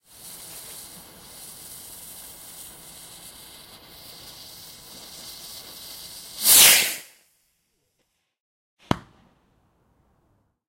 Recordings of some crap fireworks.